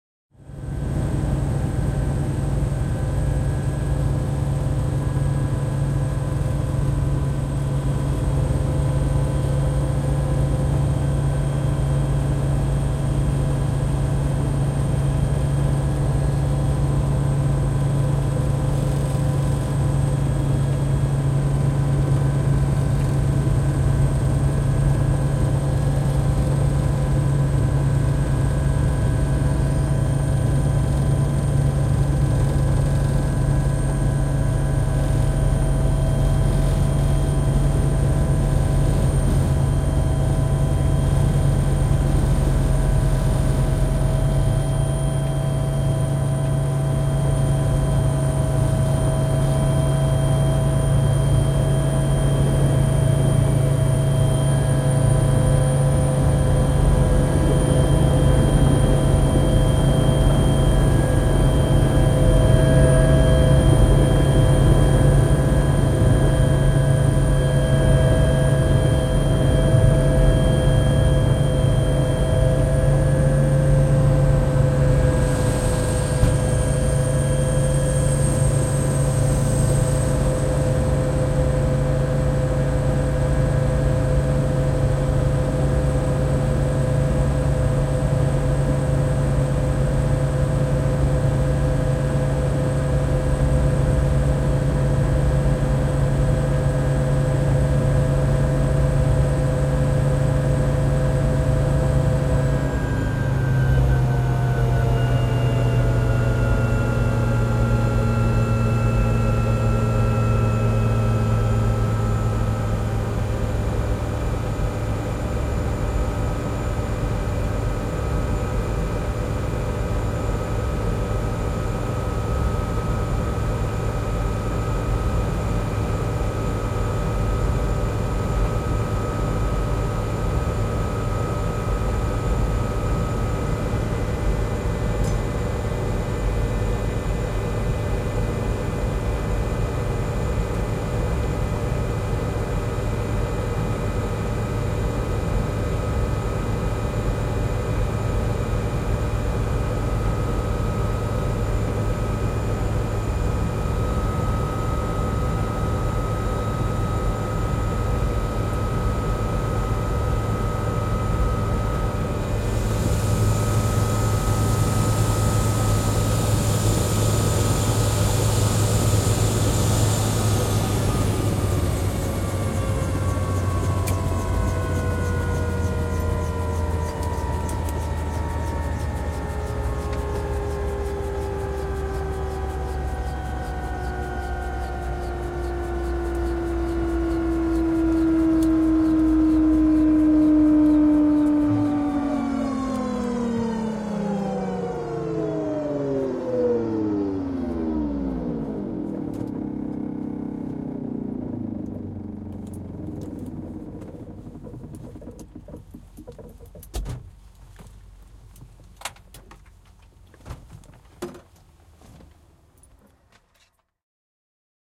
Helikopteri, lento, laskeutuminen, sisä / Helicopter, flying, starting to land, landing, engine shuts down slowly, rotor blade spins, interior, AB 412 Agusta, a 1986 model
AB 412 Agusta, vm 1986. Lentoa, alkaa laskeutua, laskeutuu, moottori sammuu hitaasti, roottorin lapa pyörii. Sisä.
Paikka/Place: Suomi / Finland / Helsinki, Malmi
Aika/Date: 06.10.1992
Field-Recording, Finland, Finnish-Broadcasting-Company, Flight, Flying, Helicopter, Helikopteri, Interior, Landing, Lasku, Lento, Soundfx, Suomi, Tehosteet, Yle, Yleisradio